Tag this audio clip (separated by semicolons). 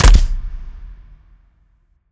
Airgun; field; tools; recording